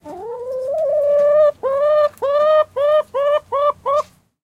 Sounds of a happy chicken (hen) deciding if the microphone was edible (distance ~15 cm chicken looking straight at mic).